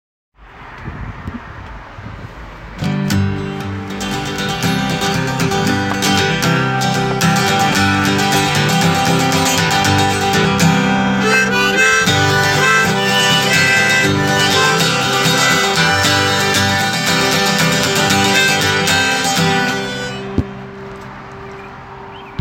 Easy going upbeat sound